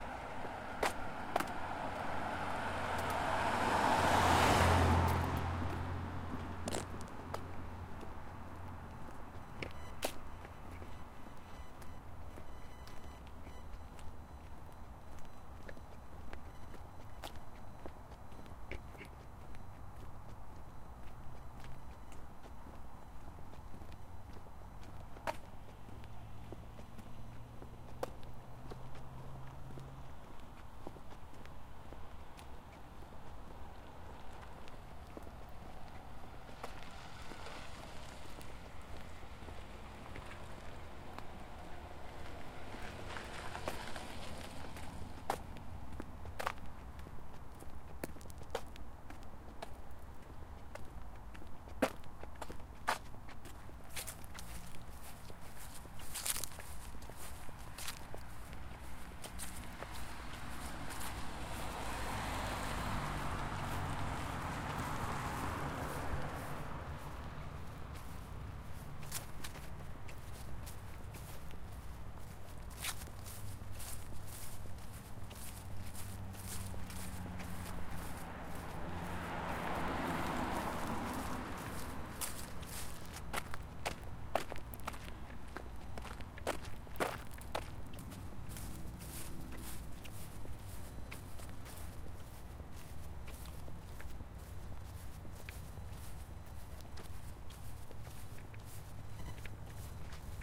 WALKING STREET SUBURB 02
A recording of myself walking through a quiet suburb with a Tascam DR-40
suburb, street, walking